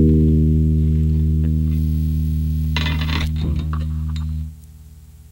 experimenting with a broken guitar string. had just plugged in (to my crappy Peavy practice amp) and sat down to start messing around.
amp, broken, experimental, guitar, noise, string